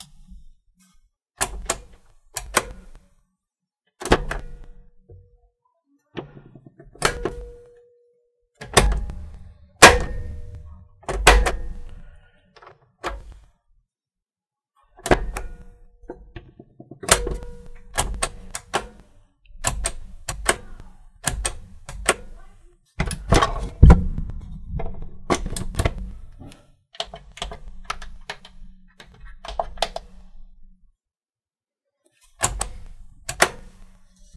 The sound of pressing buttons on an old cassette player
Casette, Click